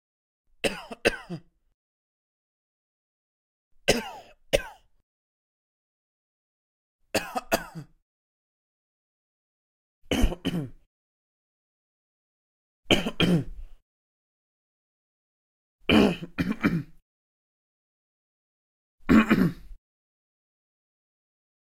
07 - Cough male - light
Light cough of a man